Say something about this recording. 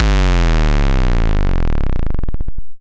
GNP Bass Drum - Drop The Soap
Huge Bass Drop dripping with distortion.
bass, drop, gabber, gnp, hardcore, hit, kick, kick-drum, powernoise, single